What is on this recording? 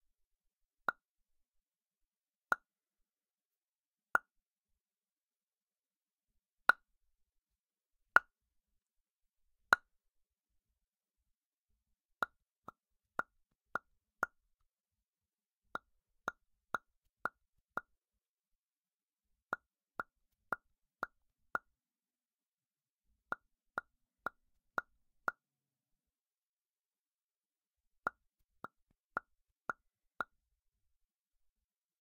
Using the front piece of a turbojav to create single and multiple popping noises.
Burst, Pop, OWI, Rubber, Popping